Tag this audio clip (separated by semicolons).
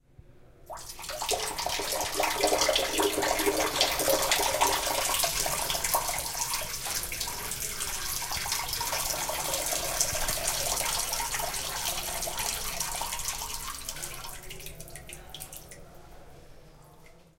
poo; pee; campus-upf; UPF-CS13; drop; clean; bathroom; urine